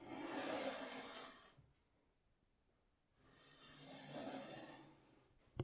opening of the lift doors
Lift doors opening sound
lift, opening, elevator, doors